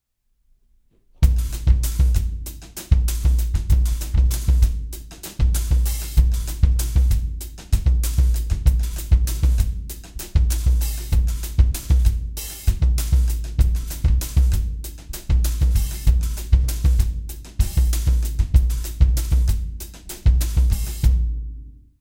A bunch of drum loops mixed with compression and EQ. Good for Hip-Hop.